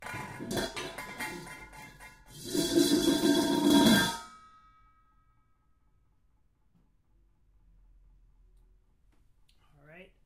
pots n pans 15
pots and pans banging around in a kitchen
recorded on 10 September 2009 using a Zoom H4 recorder